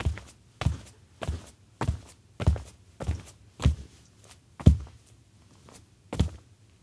Footsteps, Solid Wood, Female Socks, Jumping